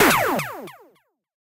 8-Bit style retro style blaster gun sound made with a vintage Yamaha PSR-36 synthetizer.
Processed in DAW with various effects and sound design techniques.